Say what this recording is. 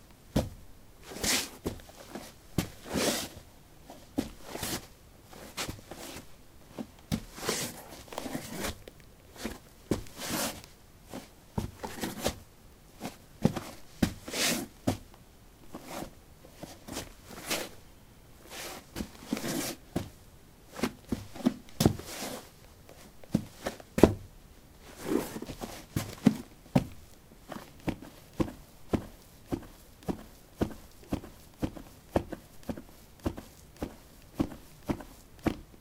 soil 15b darkshoes shuffle tap
Shuffling on soil: dark shoes. Recorded with a ZOOM H2 in a basement of a house: a wooden container placed on a carpet filled with soil. Normalized with Audacity.
footstep, step, steps, walk, walking